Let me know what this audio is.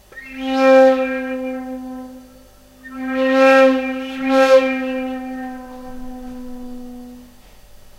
Flute Play C - 01
Recording of a Flute improvising with the note C